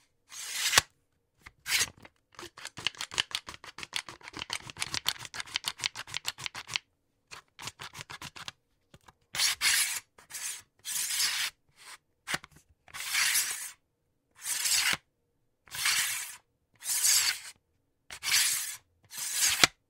Metal Tool Clamp Sliding
Operating a sliding metal clamp.
slide, clamp, tools, industrial, mechanical, metal, tool, clamps, slides